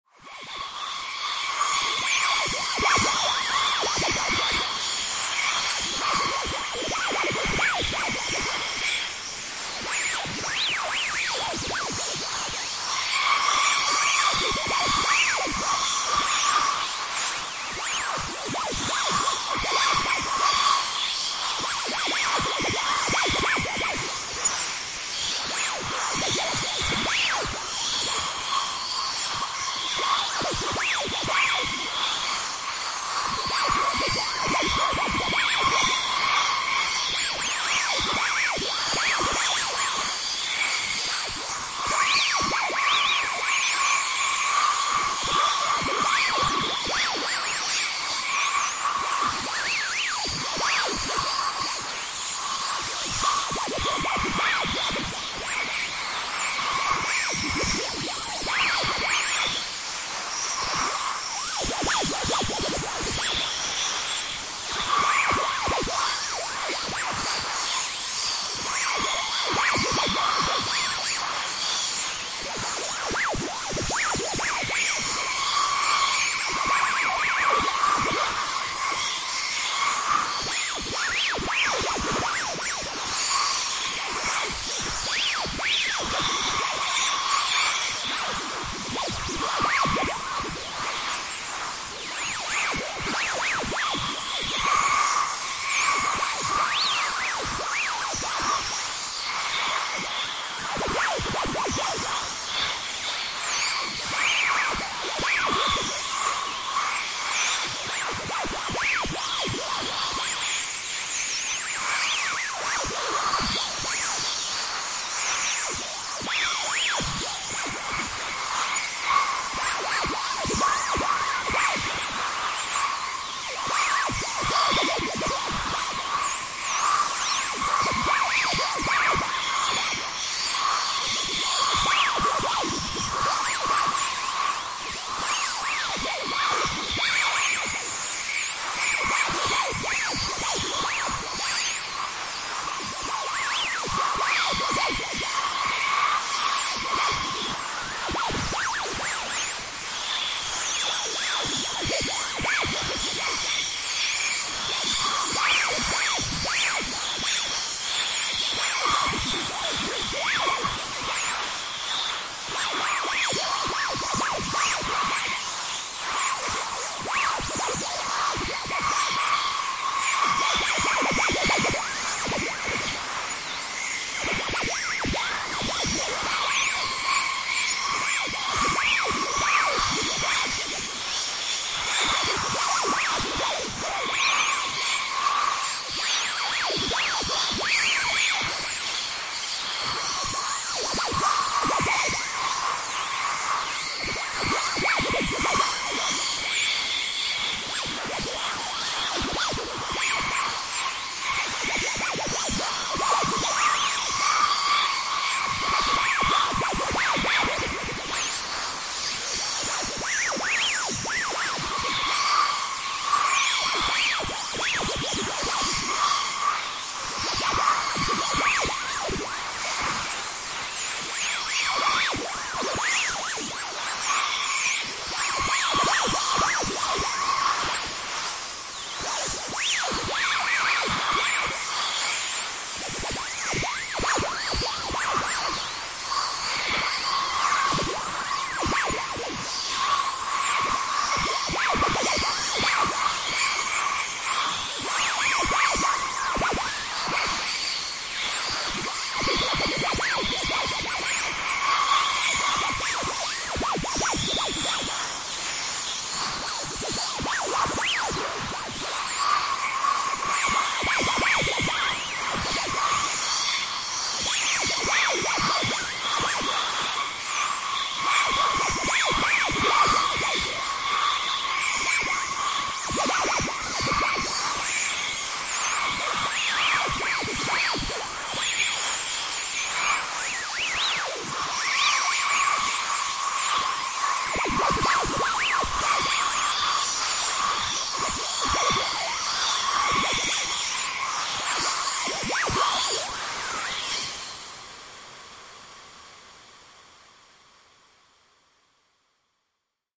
This sample is part of the "Space Drone 1" sample pack. 5 minutes of pure ambient space drone. Space birds in battle with laser insects.